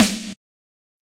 Gated Reverb Snare
A snare with a gated reverb. I don't know how exactly I made this, though I know I started with either a LinnDrum or a Linn 9000 sample.